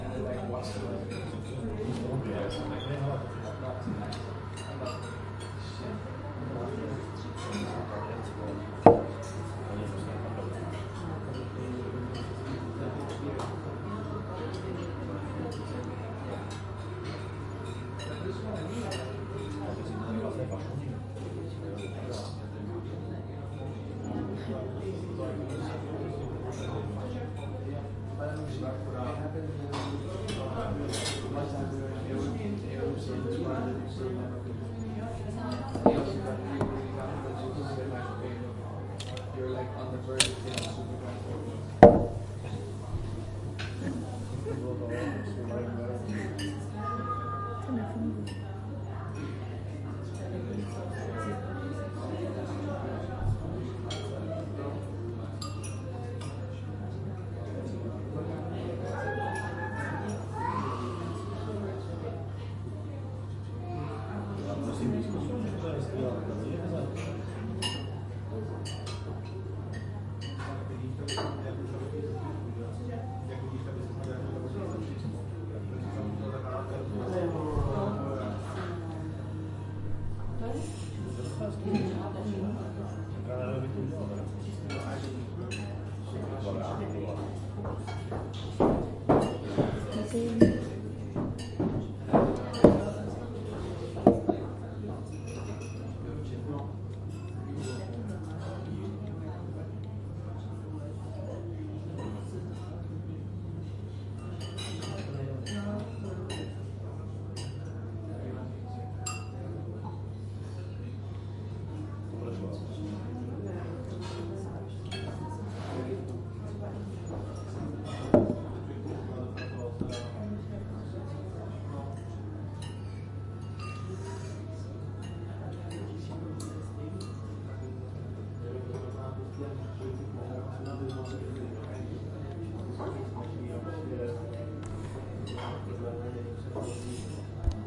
Recording of a small, silent restaurant in Czech republic, people talking, sounds of cutlery and glasses on the table. Recorded by Zoom H4n and normalized.